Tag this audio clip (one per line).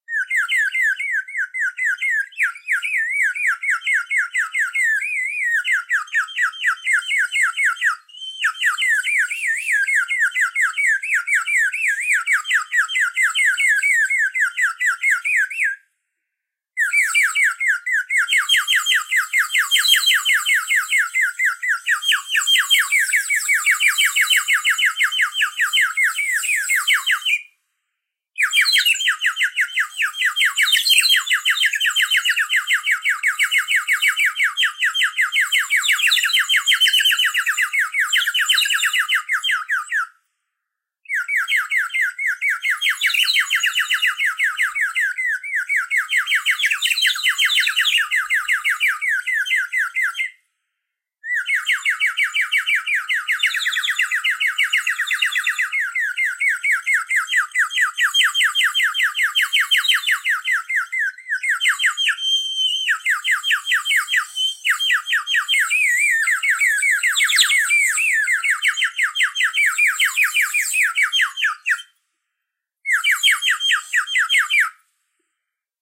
bird
birdsong
chirp
clay-bird
imitation
whistle